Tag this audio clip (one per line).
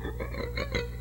experimental
plucking
pluck
guitar
scraping
noise
string
broken